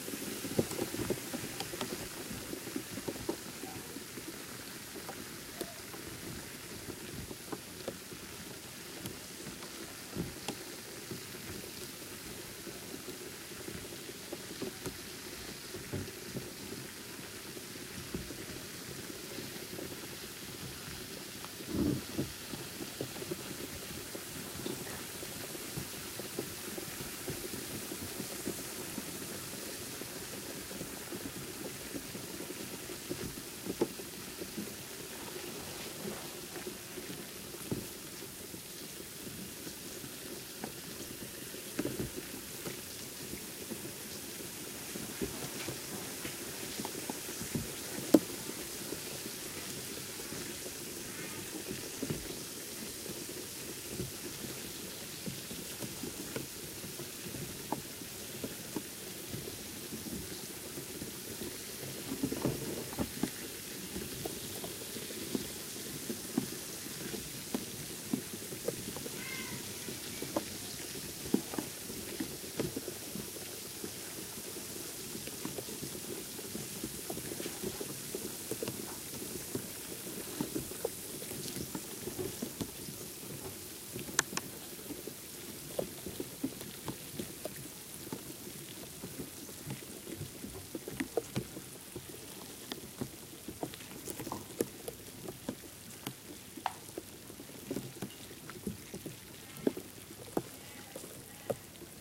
dissolving liversalts
A recording of liver salts dissolving in water. Recorded with my 4th-gen iPod touch, I put it into a plastic bag to keep it dry and we get the up close recording of the salts fizzing and in the end dissolving.
bubbles, fizz, submerged, water